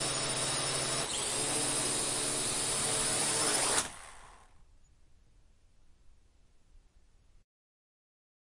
Quadrocopter recorded in a TV studio. Zoom H6 XY mics.